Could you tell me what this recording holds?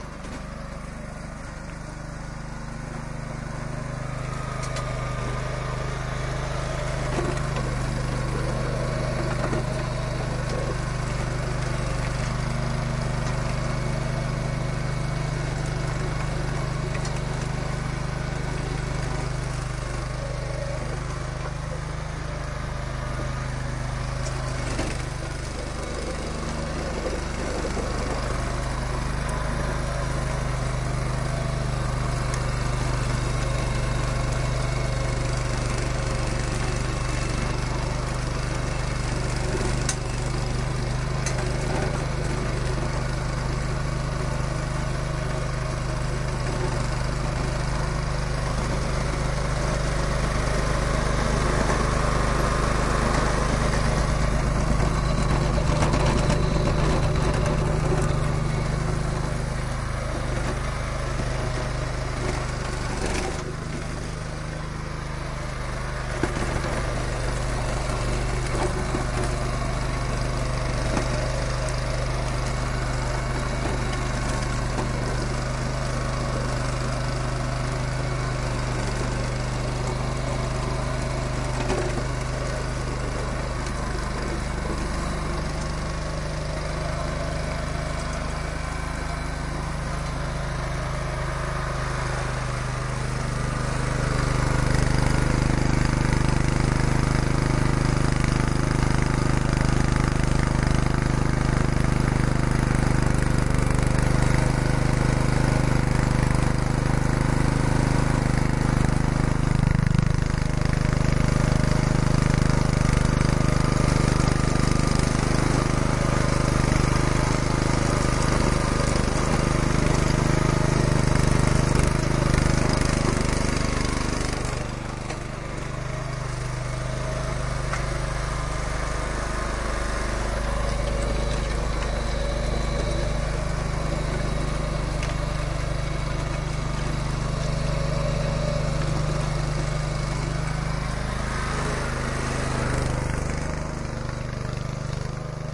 2 minutes following a rotovator (an engine-driven tool for turning over the top layer of soil) around a small garden in preparation for turfing
soil, rotovator, motor, small-stones, engine, earth